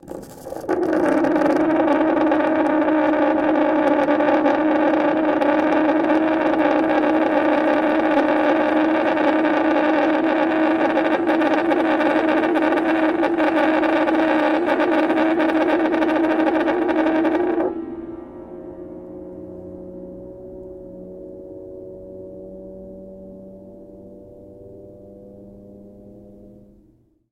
recordings of a grand piano, undergoing abuse with dry ice on the strings